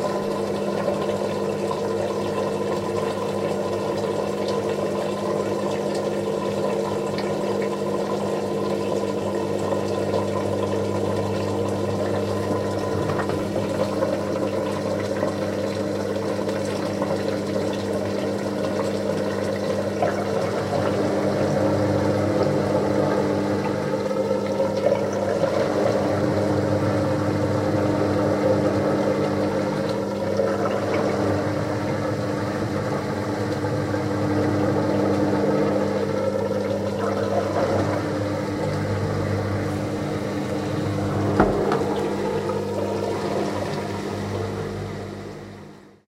Washing Machine 3 Drain
bath, bathroom, domestic, drain, drip, dripping, drying, faucet, Home, kitchen, Machine, mechanical, Room, running, sink, spin, spinning, tap, wash, Washing, water